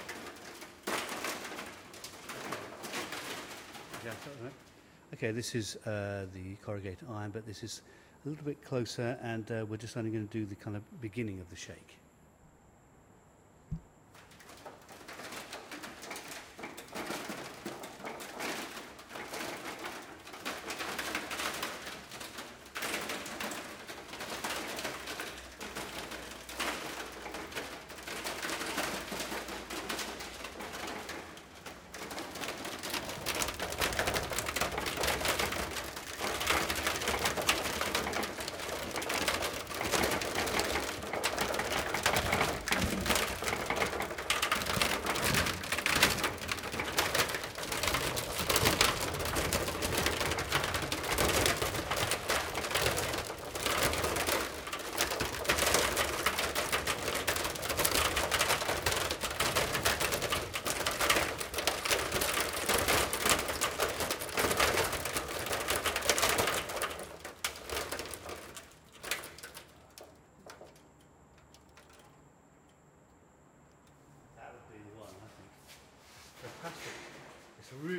As part of some location sound recording for a sci-fi film we rcorded a load of corrugated iron being shaken.
alex-boyesen, boyesen, corrugated-iron-sheet, digital-mixes, earthquake, metal, metal-rattle, metal-shake, metal-sheet, rattle, shaking, waggle